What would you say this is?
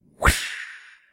Recorded by mouth